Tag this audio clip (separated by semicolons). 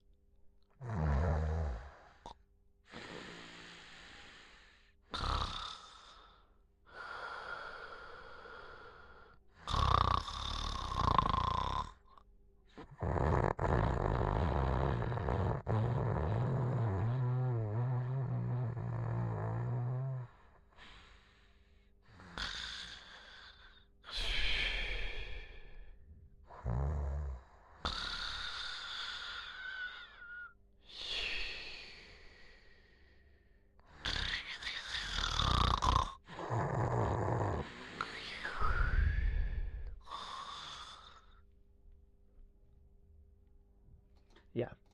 snores; snoring; Man; snore; Male; Low; sinus